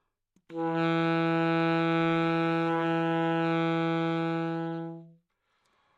Sax Alto - E3 - bad-timbre bad-richness
Part of the Good-sounds dataset of monophonic instrumental sounds.
instrument::sax_alto
note::E
octave::3
midi note::40
good-sounds-id::4935
Intentionally played as an example of bad-timbre bad-richness